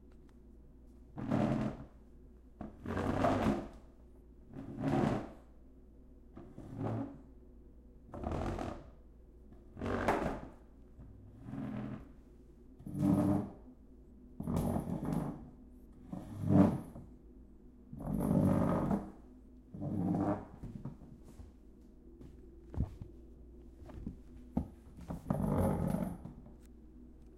Dragging Kitchen Chairs
Pulling a chair back from kitchen table.
Recorded on Sony M10.